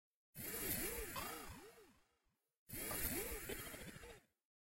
Breeth 102bpm
ABleton Live Synthesis